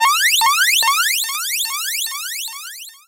A high-pitched alarming sound.
Created using AS3SFXR